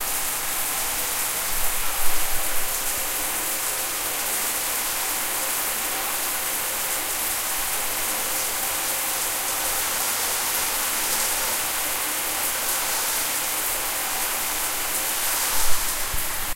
Shower AB
My shower's running water, recorded from the top of the shower door.
bath, bathroom, running, Shower, water